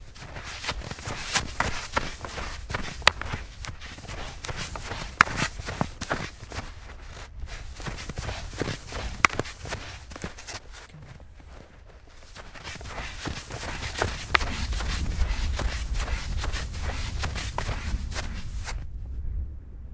footsteps snowshoes old wood1 semipacked snow run fast speed short shuffle steps +wind
footsteps, old, packed, snow, snowshoes, wood